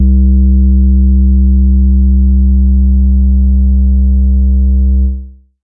bass sub in C sustained
I produced this sound using Massive exported through FL Studio 20. Clean bass sound sustained with no distortion. I am interested to hear what people do with it so if you post a link here I will definitely listen to it.
808, bass, electronic, hip-hop, sub, trap